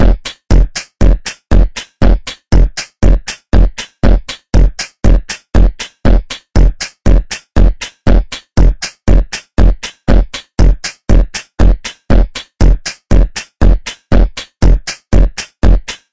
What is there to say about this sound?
Drum Loop 1 - 119 Bpm
1, loop